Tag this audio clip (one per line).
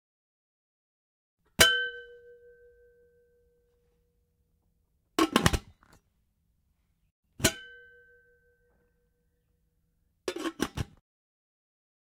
open
metal